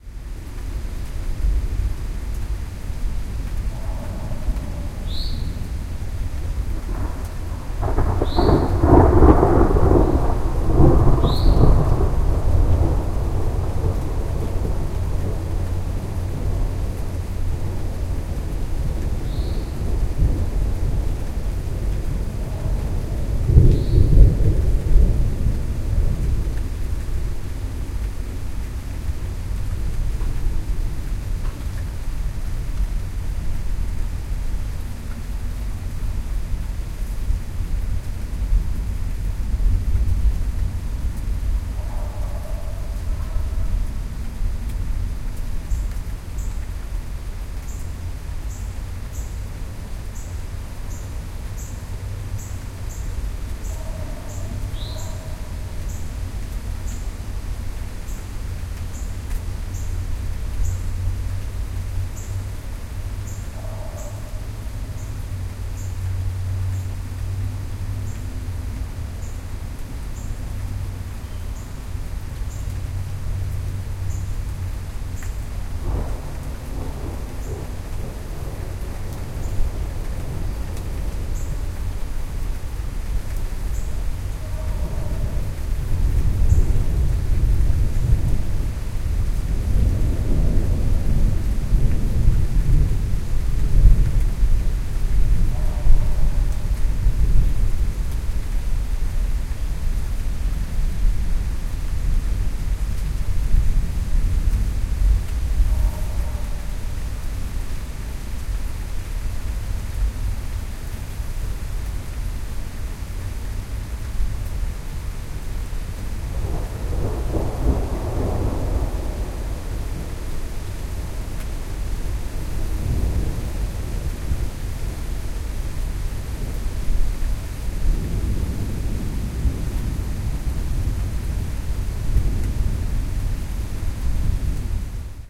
light rainstorm
About 2 minutes of light rain, birds tweeting, distant dogs barking, and low thunder rumbling. The track fades in and fades out. Recorded with a Roland Edirol R-09HR and edited in Audacity.
ambient, storm, dogs, bark, birds, rain